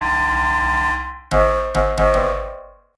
A Banana Peeling its soul.